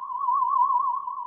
Sci-Fi Sensors
Classic trek-ish "sensors" sound made with a digital theremin.
theremin, sensors, sensor, scan, sci-fi, science-fiction